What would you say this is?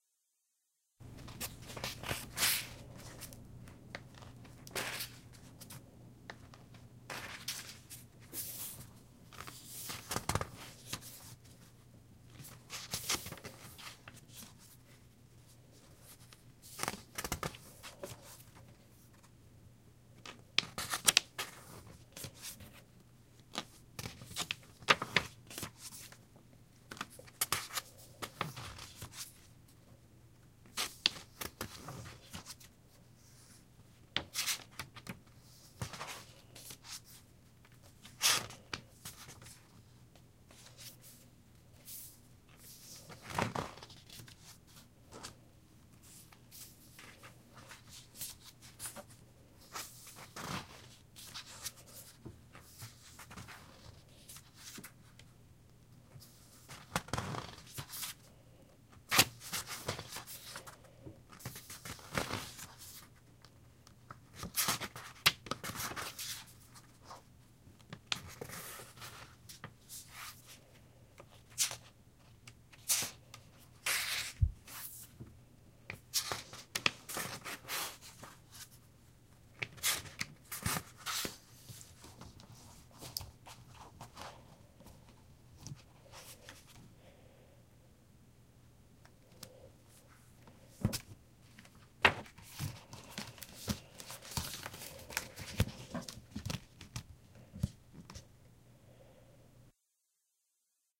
turning pages in book
slowly turning pages in a book